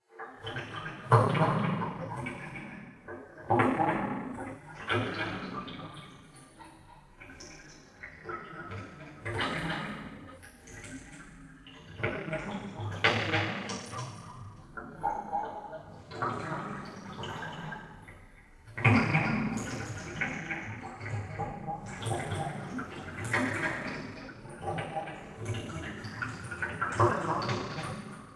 water in hell
Gently shaking a big bottle of water. processing: echo, reverb.
hell, water